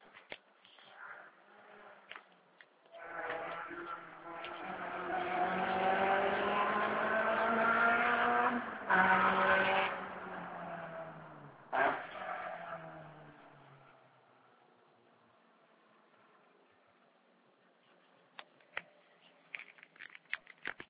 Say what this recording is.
Remote competition. Sound of engine about 1 km far. Recorded by Nokia 6230i.